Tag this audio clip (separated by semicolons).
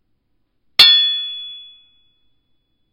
anvil; blacksmith; clang; clank; clink; hammer; hit; impact; metal; metallic; ping; steel; strike; ting